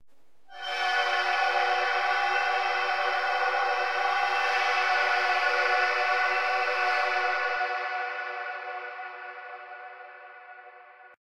Melodica Dissonance
A recoding I made of my melodica and then processed it with Magnus's Ambience Demo plugin along with Eq tweaks.
melodica, organ, electronic, dissonant, ambient, reverb